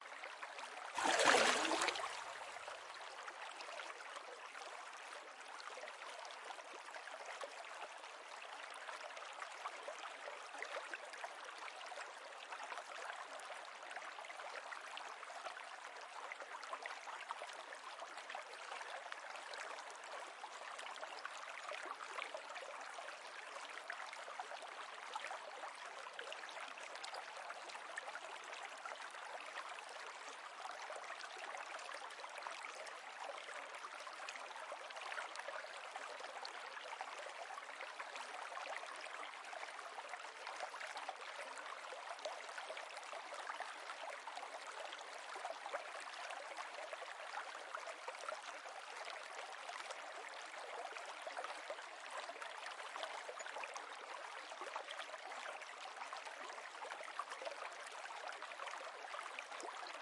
Fish Release
Fish being released into a river
fish, swimming